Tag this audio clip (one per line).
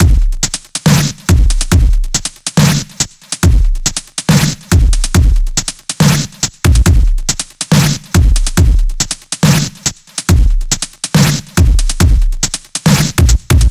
Adlib
PC
C64
fresh
funky
MOD
beefy
drums